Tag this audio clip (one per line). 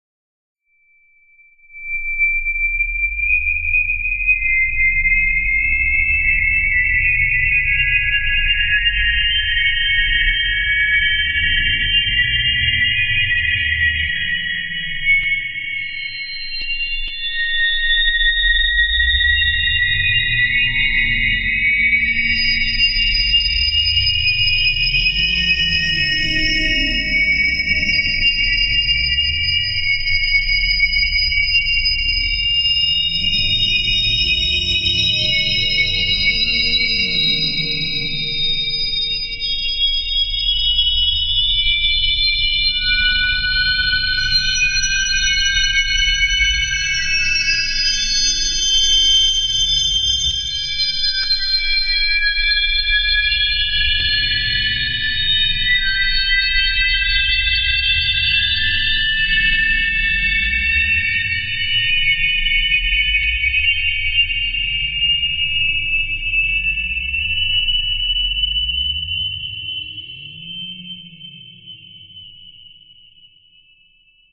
horse
Dog
electric
pizza
EL
sound
King